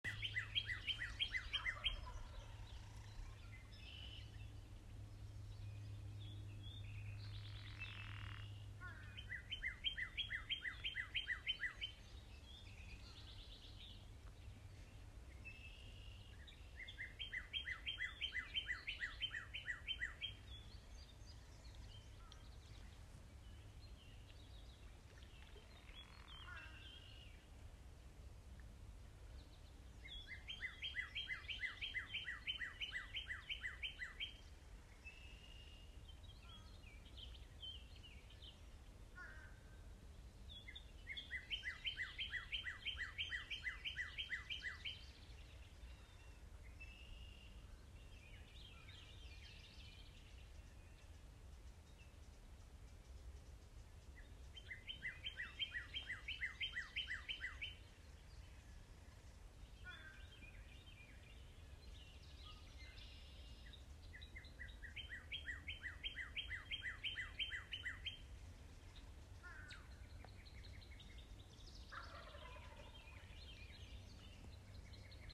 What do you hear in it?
(Raw) Birds 2
A raw recording of birds in a rural forest in Maryland. No background noise has been filtered out.
birds, field-recording